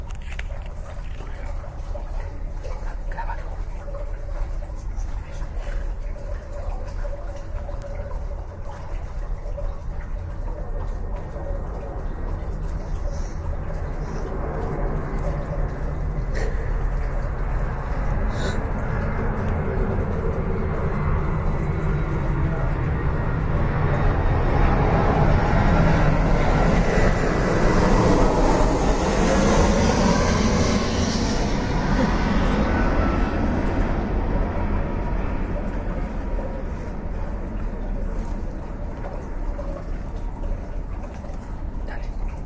Polla d'aigua - Deltasona
Sound recorded in the framework of the workshops "El Delta del Llobregat sona" Phonos - Ajuntament del Prat - Espais Naturals Delta. Nov 2013.
wind, Deltasona, polla-d-aigua, nature, elprat, airplanes, Bird